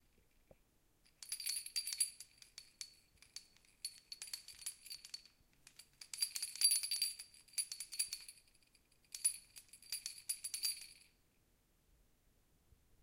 Rabbit Jingle Decor
It is a rabbit shaped decor with wooden beads and small bells, that I hang on the doorknob of my room.
Recorded with my new Zoom H5(XYH-5) recorder.
Sound recorded October 21st, 2018